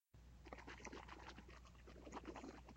efectos de sonido the color of evil